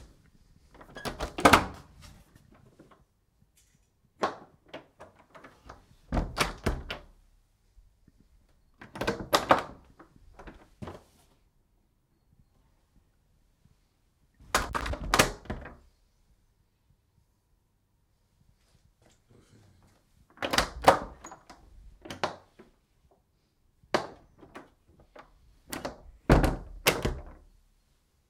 Wood Door Open and Close
wooden door opened and closed several times